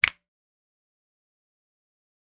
Real Snap 21
Some real snaps I recorded with an SM7B. Raw and fairly unedited. (Some gain compression used to boost the mid frequencies.) Great for layering on top of each other! -EG
finger, finger-snaps, percussion, real-snap, sample, simple, snap, snaps, snap-samples